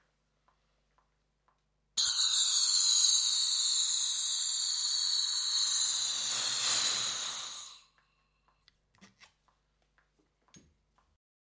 Laughing gas/nitrous oxide/nos balloon inflation audio sample #03
Inflation of nos balloon recorded on wide diaphragm condenser, with acoustic dampening around the mic but not in studio conditions - should be pretty cool for a non synthy noise sweep, or for a snare layer